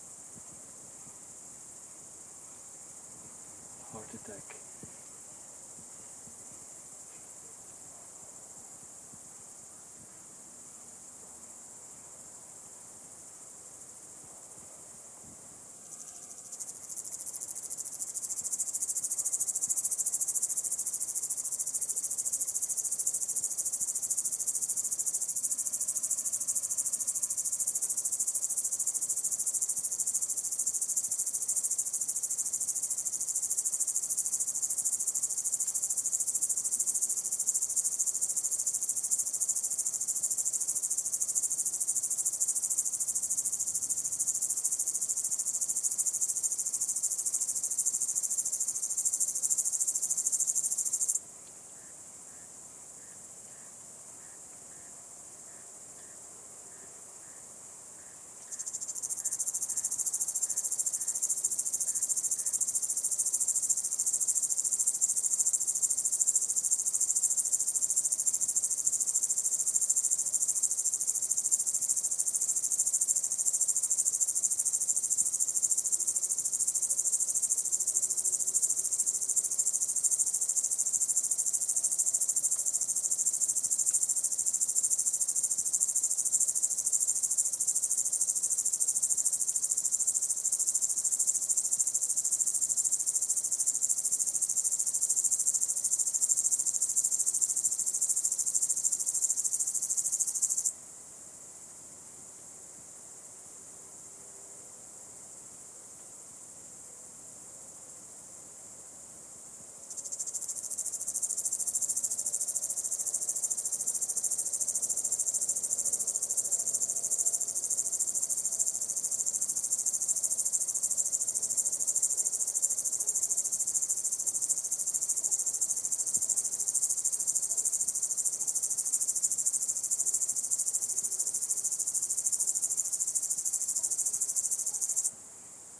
160713 FX Single Cicade or Cricket at Night M-RX
Recorded in Bielowieza Forest (Poland) with MKH50
Night, Cricket, Forest, Cicade